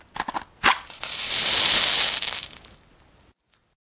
Match Strike
Simple sound of a striking match.
strike, six-sounds-project, fire, mono, match